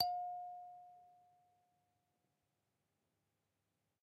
I sampled a Kalimba with two RHØDE NT5 into an EDIROL UA-25. Actually Stereo, because i couldn't decide wich Mic I should use...
kalimba, african, short, sound, unprocessed, pitch, note, f, nature